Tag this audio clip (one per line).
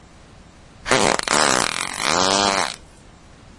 explosion
fart
flatulation
flatulence
gas
noise
poot